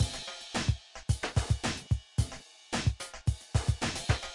Vexst SoFunky 11OBPM No BasSiE, Amigo
A beat with a very funky bassline. Just fooling around more with VEXST's wonderful snares.
remix, mix, so, drums, funky, beat, bass-slap, loop, bass